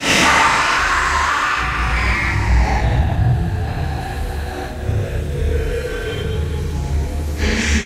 POYARD BERTRAND 2018 2019 LAUGH
LAUGH
In this sound it’s a register laugh with transformation. It’s to have a more complexe song. And the goal is also to have darkest laugh than the original.
Descriptif selon la typologie de Schaeffer
Masse : Groupe de son
Timbre harmonique : terne lointain acide
Grain : rugueux
Allure : Pas de vibrato
Dynamique : violente
Profil mélodique : serpentine
Profil de masse : Site
laugh, evil, joker, mad